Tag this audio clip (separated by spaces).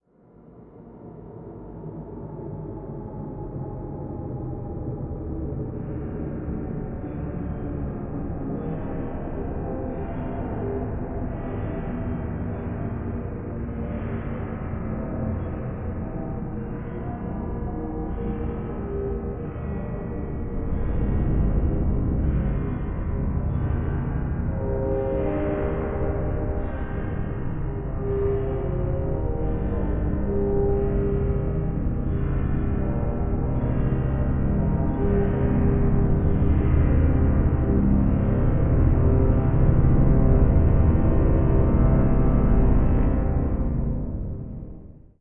dark horror mysterious Mystery Suspenseful swelling tense tension Thriller